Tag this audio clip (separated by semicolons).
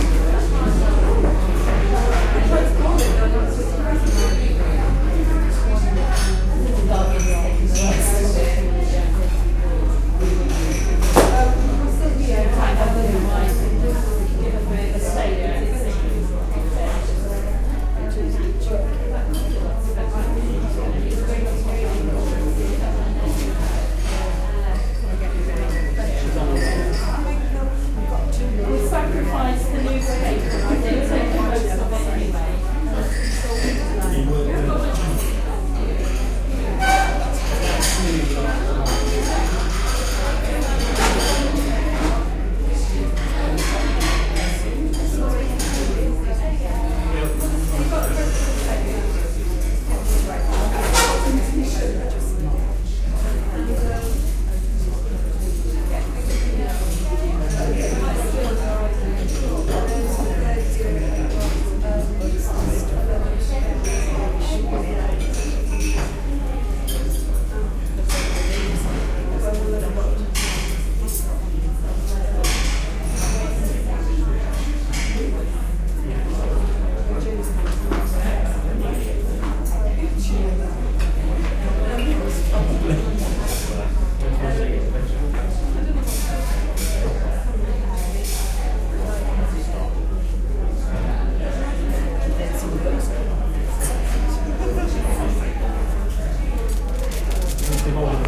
busy; crowded